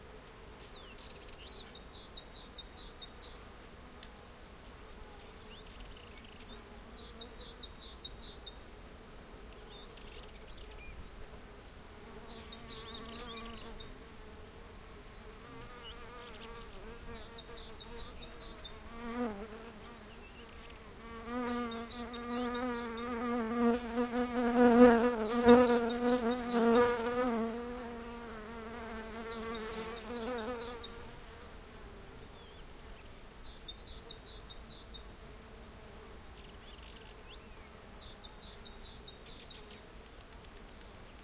20080226.bee.rbd.04
spring ambiance, birds sing, a large-bodied bee flies near the mics. Shure WL183 capsules into Fel preamp, Edirol R09 recorder
birds, spring, bee, south-spain, buzz, field-recording